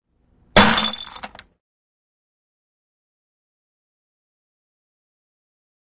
Bottle, Breaking
A bottle breaking.